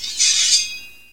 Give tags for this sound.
Fantasy
Medieval
Scabbard
Battle
Sci-Fi
Sword
Sheath
Draw

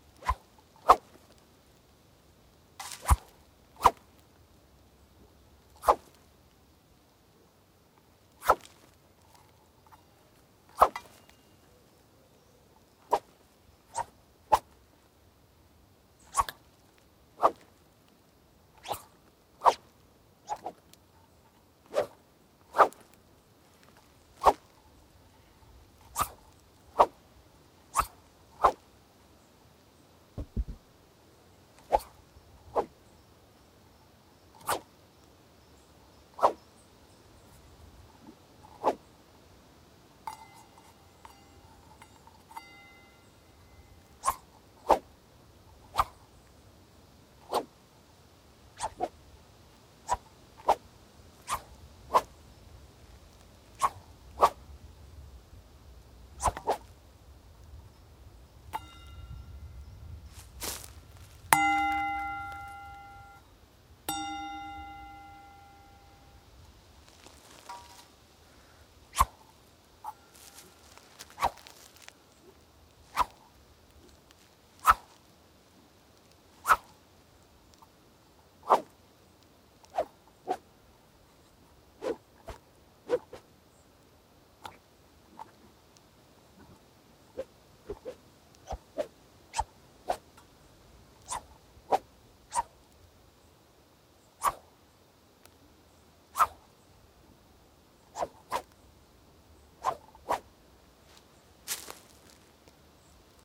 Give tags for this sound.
sword
woosh

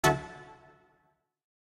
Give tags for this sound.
major
information
chime
notification
small
alert
boink
warning
reverb
ninth
chord
synth

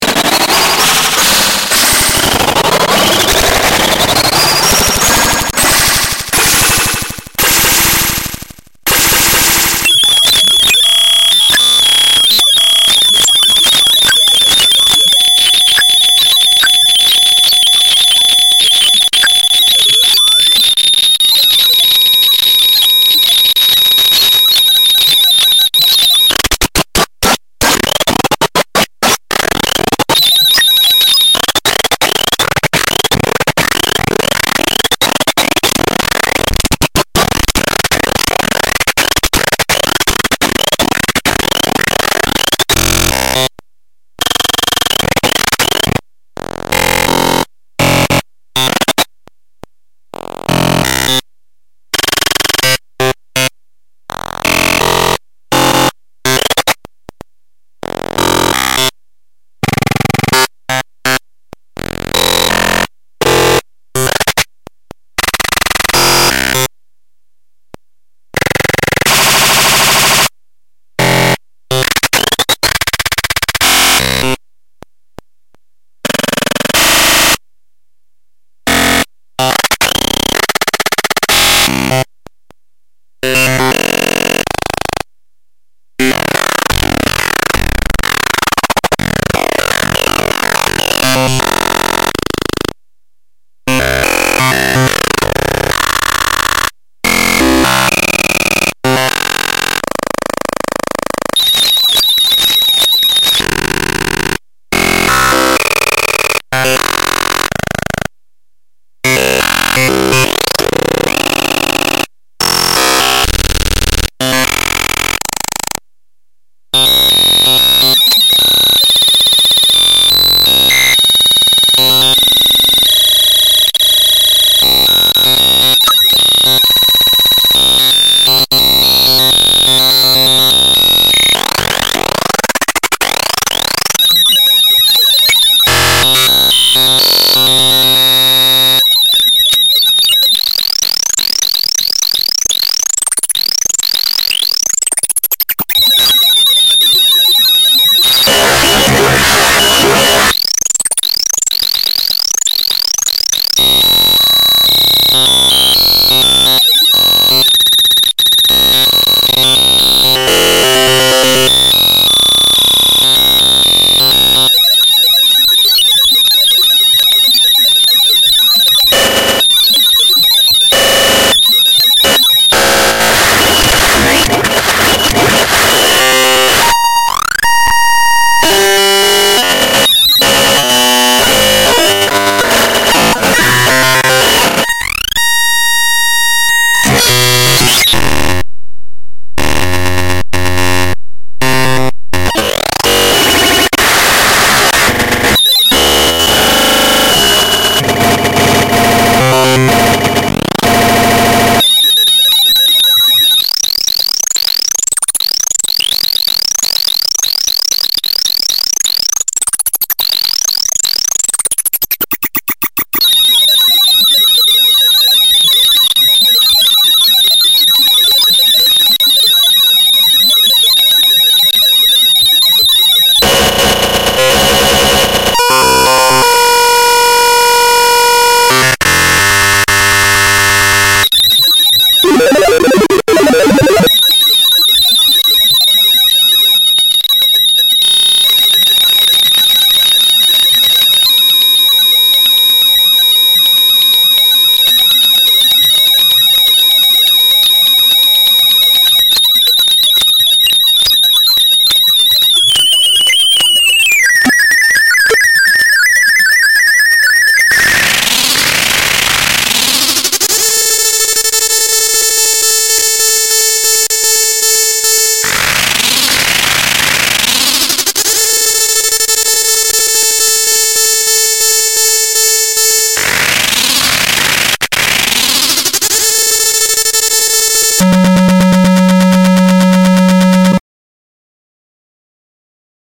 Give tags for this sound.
alien
computer
damage
destroy
digital
error
experimental
file
futuristic
glitch
harsh
laboratory
noise
noise-channel
noise-modulation
sci-fi
signal
sound-design

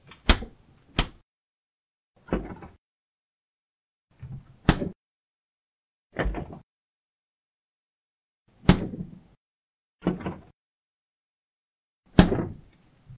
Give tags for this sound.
appliance
refrigerator
fridge

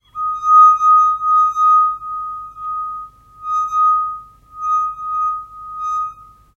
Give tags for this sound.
crystal
glass
tone
wineglass

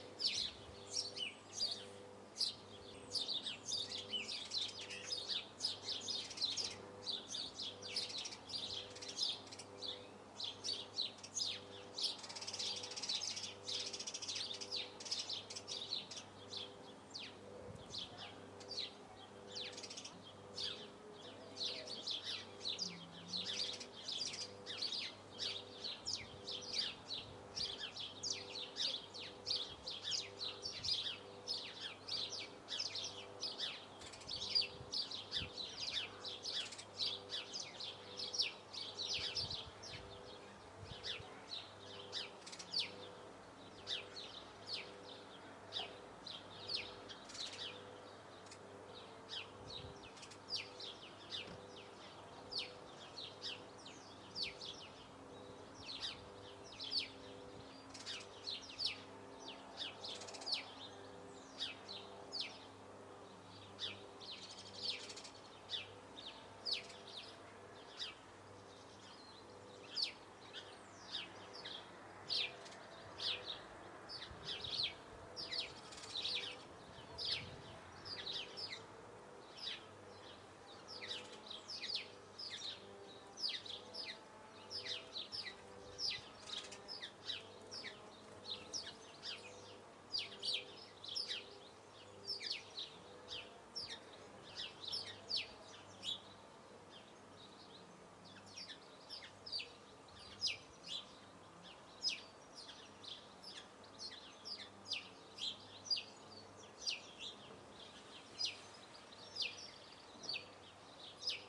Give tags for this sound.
blue-tits
rural
house-sparrows
hedge-sparrows
garden

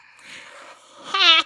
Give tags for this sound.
heh
sound
breath
weird
voice

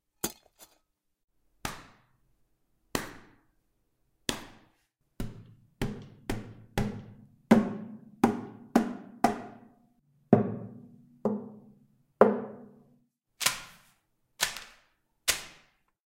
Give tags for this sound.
Hit
HITS
OWI
SMACK
SMASH
SMASHES

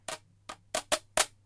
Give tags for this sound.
scrape
rough
scraping

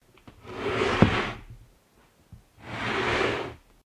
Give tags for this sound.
Metal
Scrape
Knife

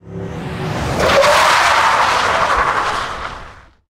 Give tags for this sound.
gravel
long
heavy
break
truck
pickup

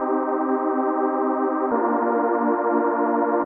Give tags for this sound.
trance
techno
pad

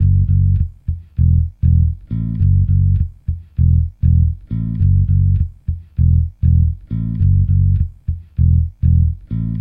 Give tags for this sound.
Ableton-Bass
Soul
Fender-Jazz-Bass
Funky-Bass-Loop
Beat
Compressor
New-Bass
Bass
Bass-Recording
Synth-Bass
Funk
Bass-Sample
Fender-PBass
Fretless
Jazz-Bass
Ableton-Loop
Hip-Hop
Funk-Bass
Logic-Loop
Loop-Bass
Bass-Loop
Synth-Loop
Drums
Bass-Samples
Groove
Bass-Groove

